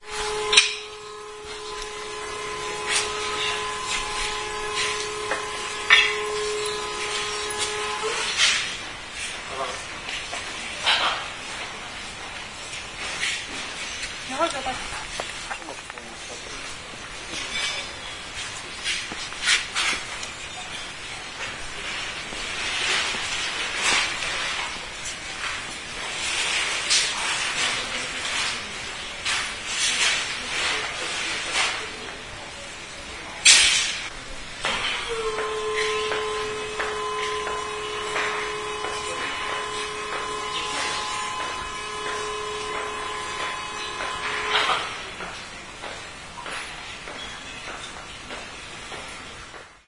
05.12.09: about 17.00 in the market with building materials called Brico Depot (in Panorama Commercial Center in Poznan/Poland).In the section with tiles. The sound of an elevator. In the background steps, voices, high heels.
no processing (only fade in/out)